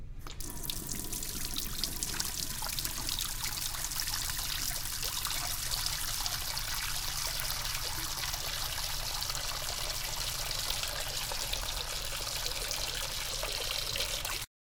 faucet turn on
turning on faucet and water running
turn, faucet